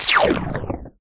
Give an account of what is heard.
Laser water gun shot.